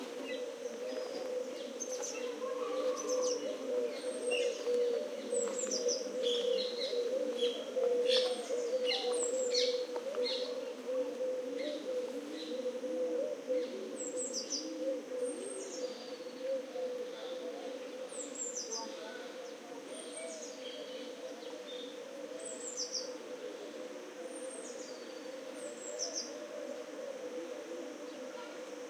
ambiente.parquemarialuisa.01
park ambient with human voices, pigeons and other birds /ambiente de un parque, con voces y sonido de palonas y otros pajaros
birds, field-recording, nature, south-spain, city